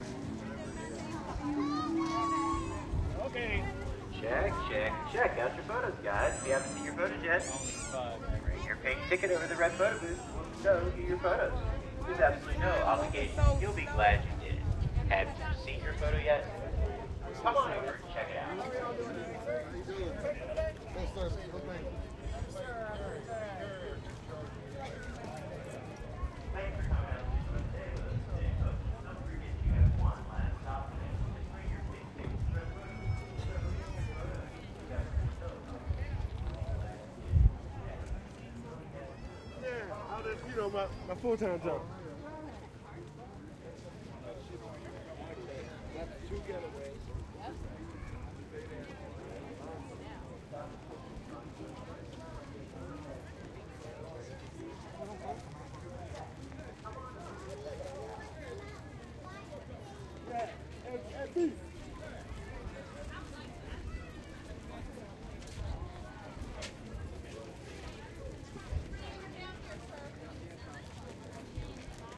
VA State Fair # 2 (Photo Booth Hawker)
Male voice encouraging crowd to "come check out your photo."
fair, virginia, state, hawker, photo